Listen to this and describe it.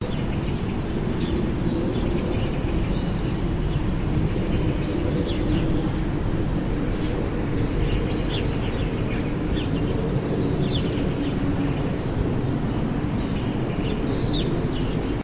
Outdoor noise of birds